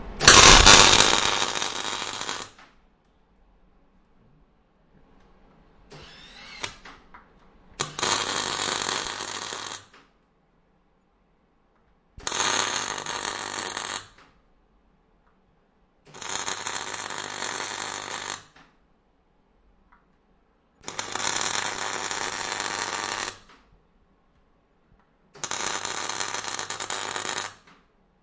electric effects
An electric buzzing, snapping sound.
electric, buzz